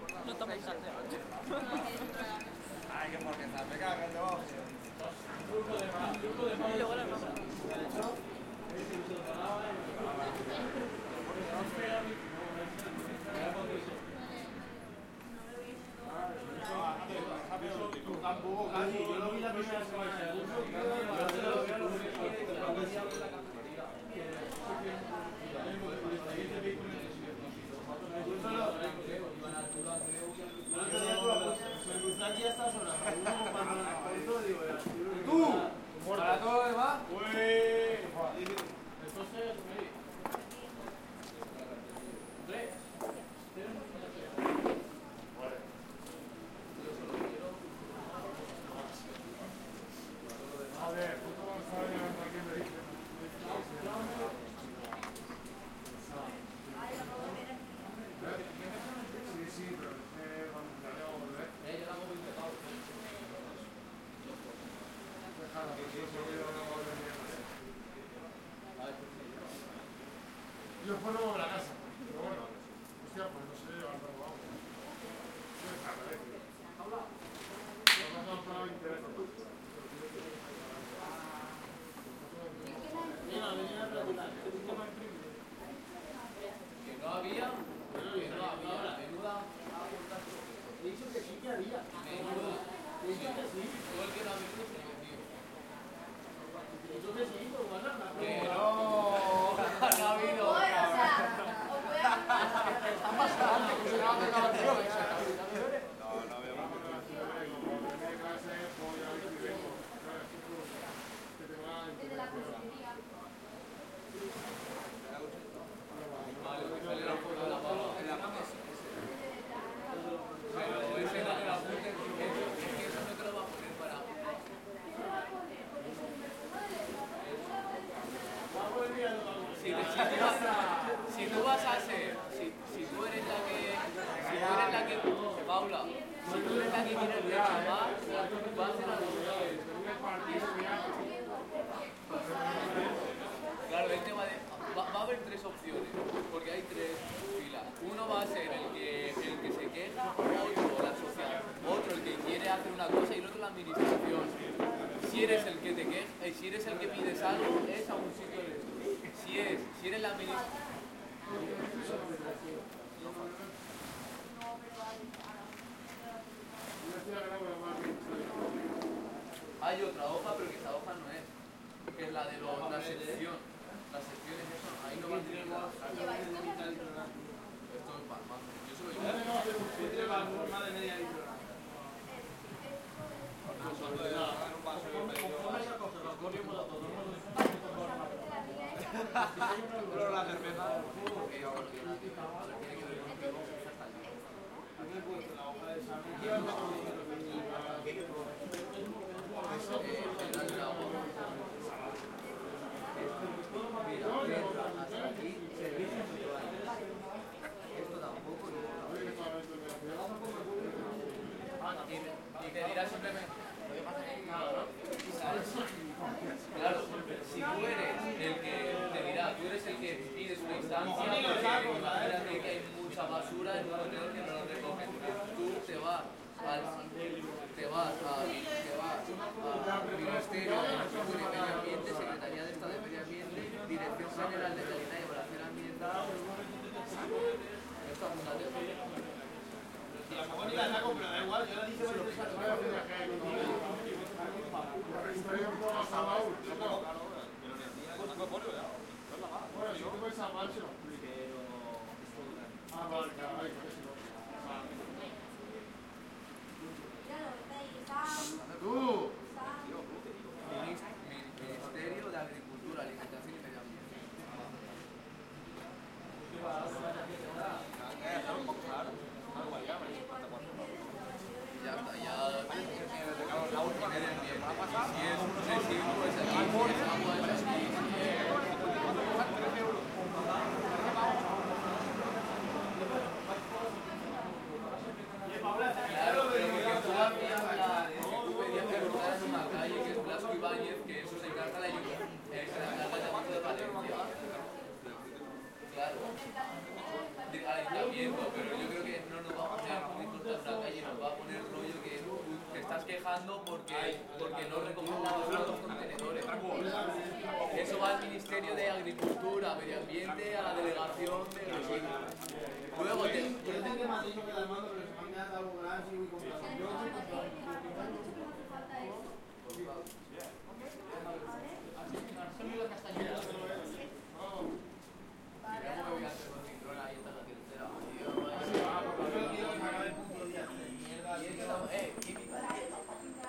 Port Bar
This sound was recorded in front of the bar of the port of Gandia's Beach. We can hear fishermen and people talking, with sound of the waves at the back.
waves, talking